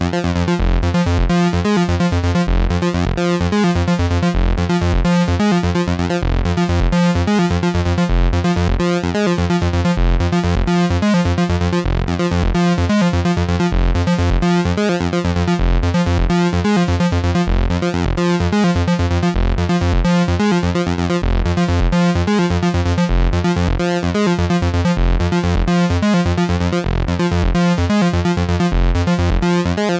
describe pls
Novation Acid Pack Fm - Bass1c
Techno Dance Loop Created with Novation Peak and Novation Circuit
128 BPM
Key of F Minor (Fm)
Portland, Oregon
June 2020
beat, 128, rave, acid, house, bass, glitch, Fm, electronic, edm, trance, loop, novation, quantized, dance, digital, techno, club, synth, rhythm